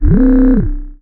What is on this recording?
PPG 018 Acidic Bleep Tone C1
This sample is part of the "PPG
MULTISAMPLE 018 Acidic Bleep Tone" sample pack. It make me think of a
vocoded lead and/or bass sound with quite some resonance on the filter.
In the sample pack there are 16 samples evenly spread across 5 octaves
(C1 till C6). The note in the sample name (C, E or G#) does indicate
the pitch of the sound but the key on my keyboard. The sound was
created on the Waldorf PPG VSTi. After that normalising and fades where applied within Cubase SX & Wavelab.
bass, lead, ppg, vocoded, multisample